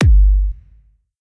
Produced with Jeskola Buzz. Generated with kick synth and mixed with some metallic noise.